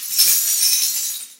Digging Coins #3
metallic, metal, pieces, coins, glass, broken, agaxly